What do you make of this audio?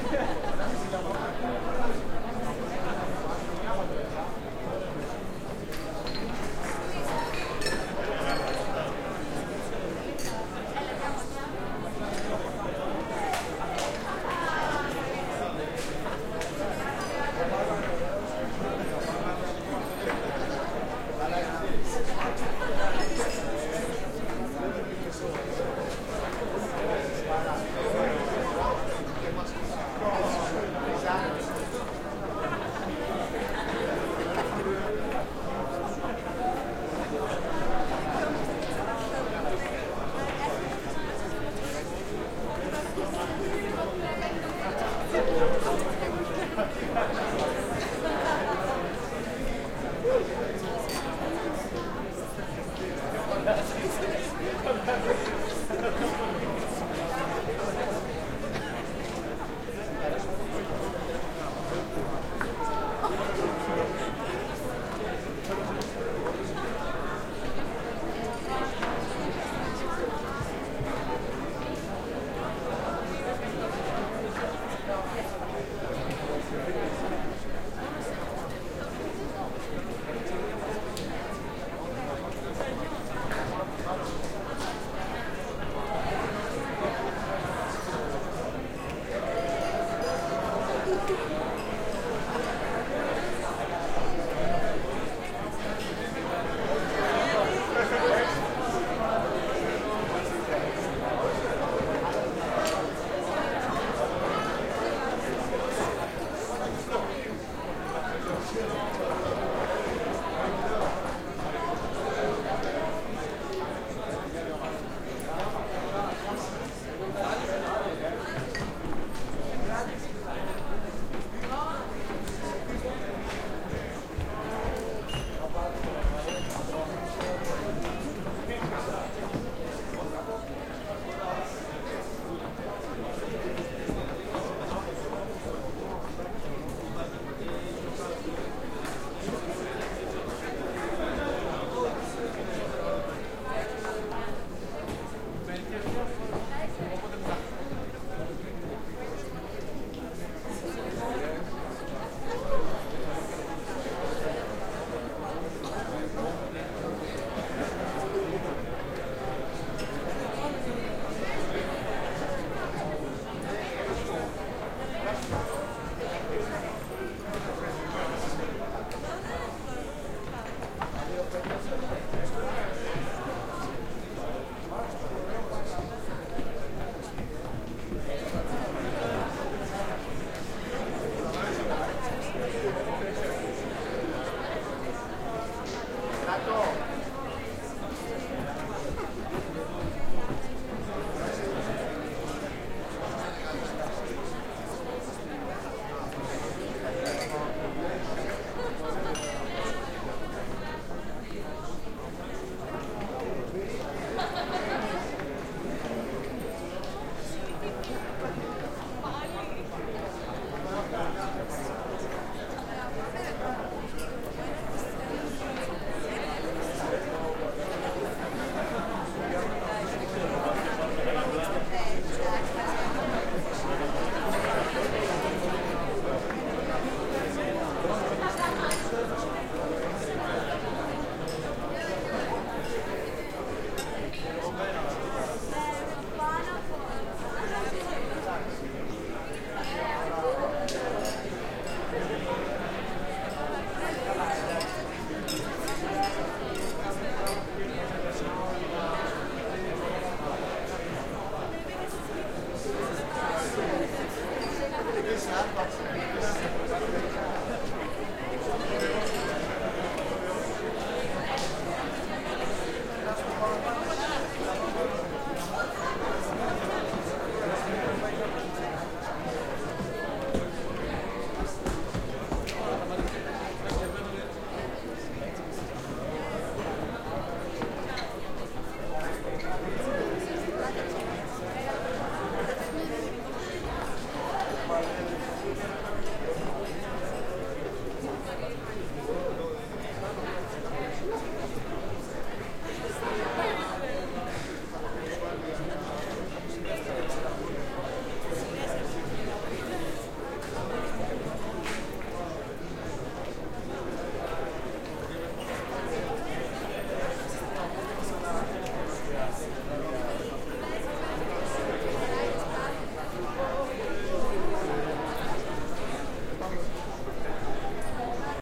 Crowd in a bar (LCR)
Crowd in a bar (LCR recording)